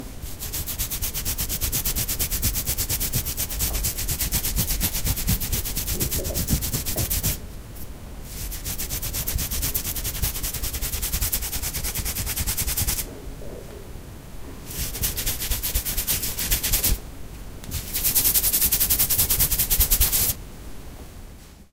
Scratching an itch.
Recorded with Zoom H2. Edited with Audacity.